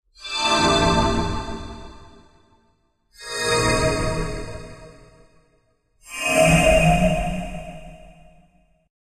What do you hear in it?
Healing Spell
heal, skill, magic, healing, priest, healer, magical, game-sound, paladin, rpg, spell